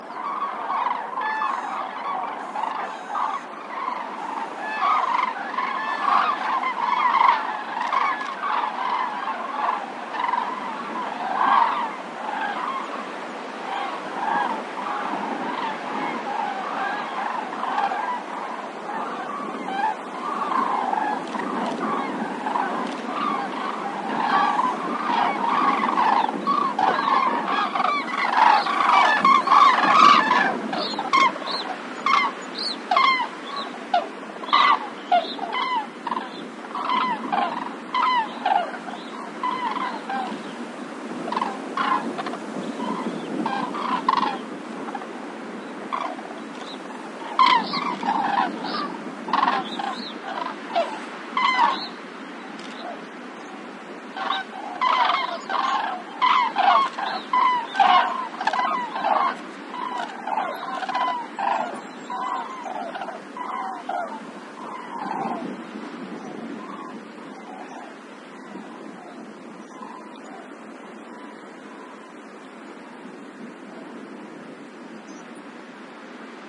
20110228 cranes.closer
calls from overheading cranes, quite close, some wind noise from nearby Evergreen Oaks. Recorded near Las Tiesas (Llerena, Badajoz province, S Spain). Sennheiser MKH60 + MKH30, Shure FP24 preamp, Sony M-10 recorder. Decoded to mid-side stereo with free Voxengo VST plugin
flickr, nature, crane, birds, grus-grus, field-recording, winter, south-spain, cawing